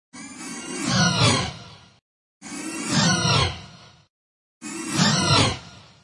Creature squealing 3
Morphing eagle sounds.
Sound by: